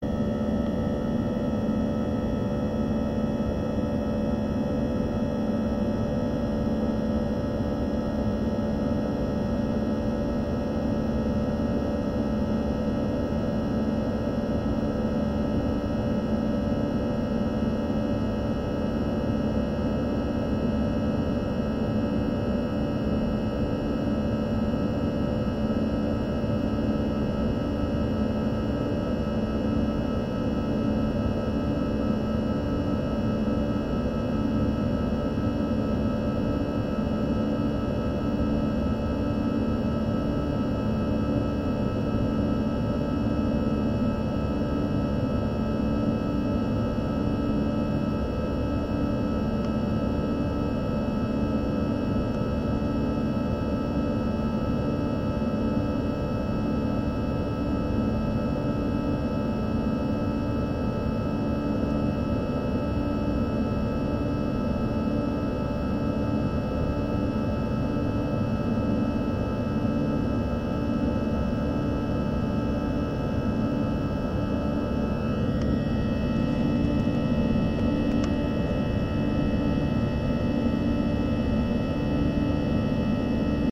airplane-interior-volo-inflight medium
this bank contains some cabin recordings by a contact mic placed in different locations.
recorded by a DY piezo mic+ Zoom H2m